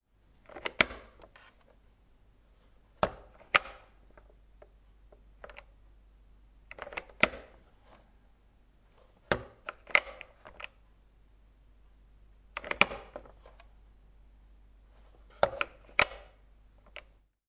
Oven door2
Oven door opening-closing
Zoom H6 recording
close, closing, door, open, opening, oven